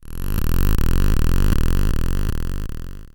8-bit car revs. Applied an LFO to a square wave I believe.I Made it with a web based soft synth called "as3sfxr".